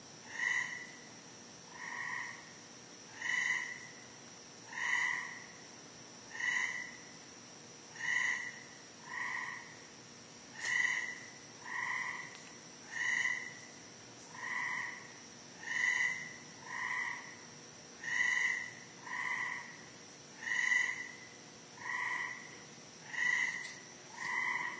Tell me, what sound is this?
CT frogs 7.13.2013
Frog announcement calls in a backyard in New Fairfield, CT. Recorded with iPhone 4S internal mic.
CT, CT-nature, frog, frog-calls, nature, nature-sounds